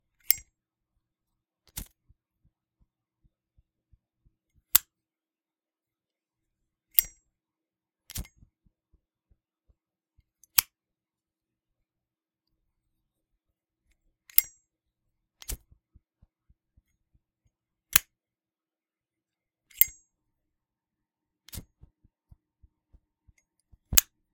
The sound of a Zippo lighter opening, lighting, and then closing multiple times.